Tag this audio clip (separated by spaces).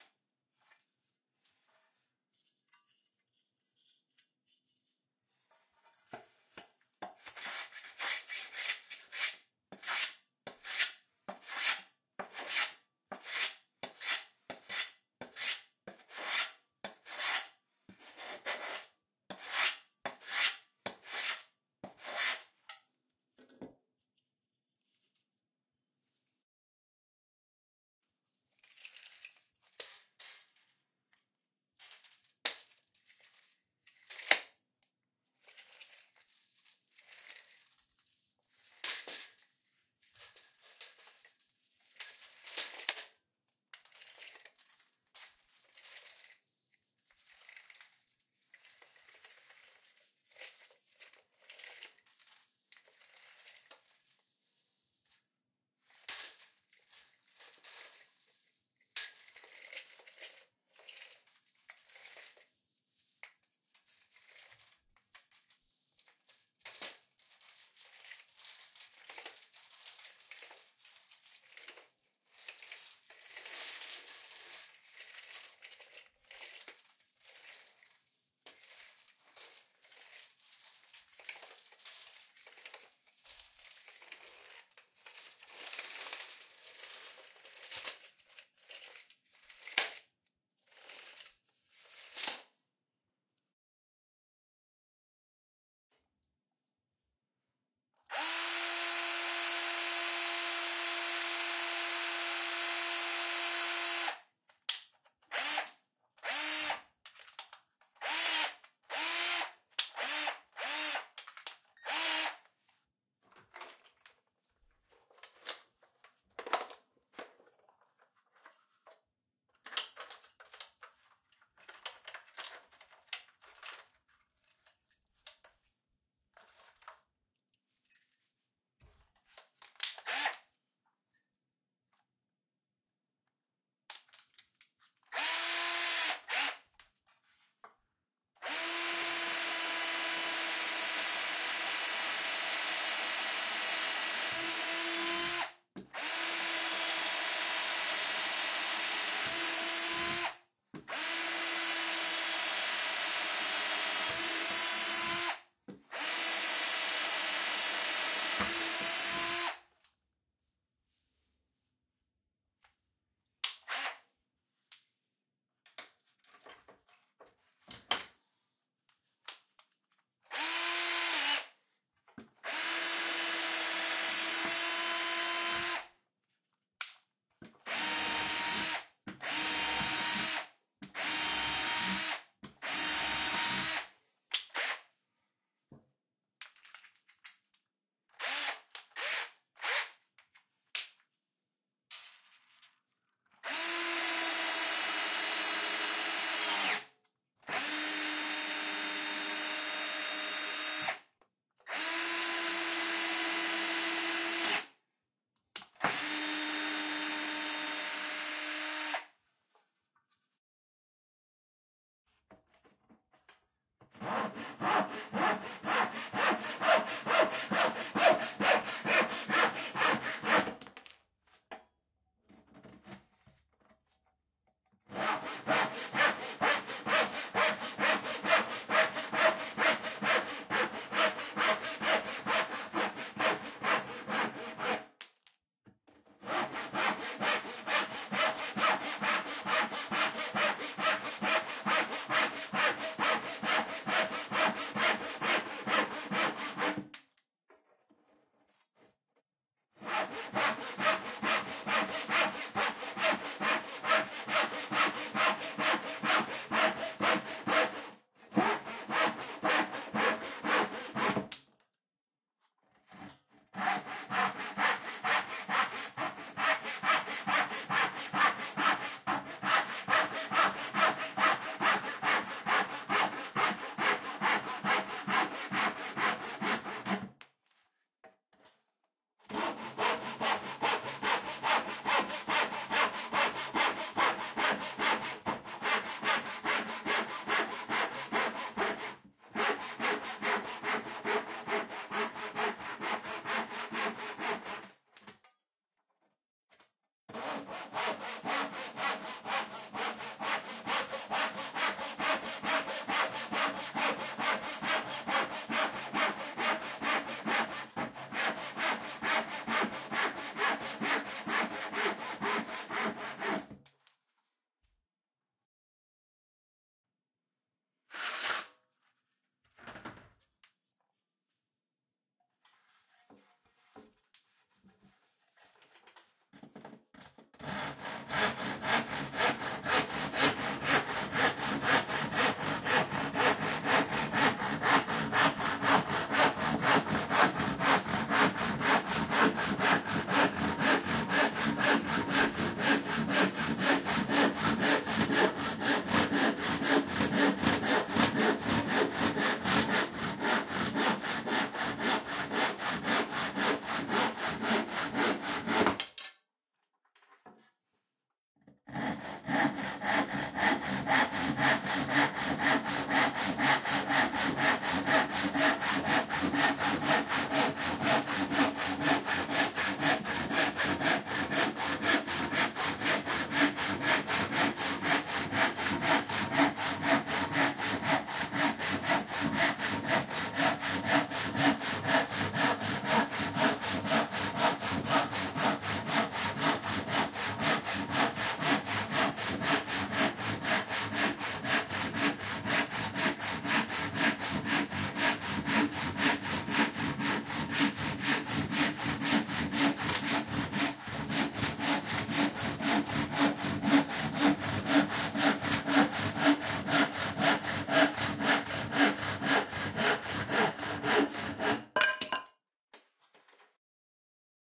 battery-drill block-plane building carpentry construction crosscut cutting drill drilling field-recording foley hand-tools joinery plane ripping saw sawing sfx sound sound-effects tape-measure tenon-saw tools wood-plane woodwork workshop